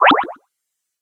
Beep created in Logic Pro